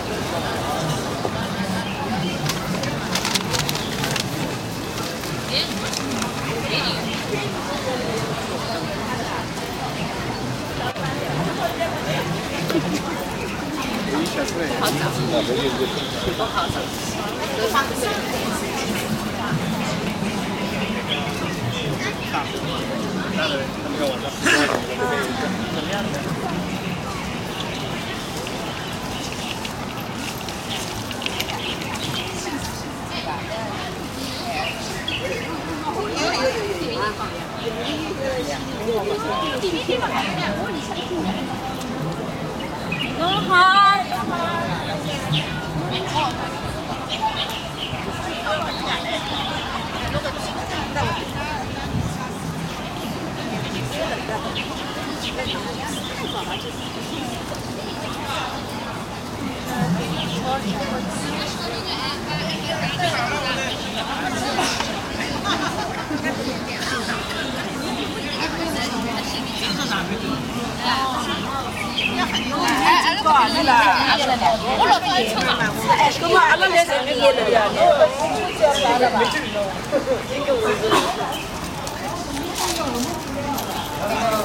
Nanjing Road East to Peoples Square, Shanghai
Recorded while walking from Nanjing Road East to People's Square, Shanghai on a Canon D550.